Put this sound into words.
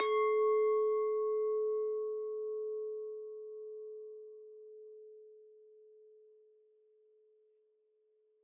Just listen to the beautiful pure sounds of those glasses :3
glass, weinglas, glas, wein, pure, clink, wine, soft, wineglass, crystal, glassy, edel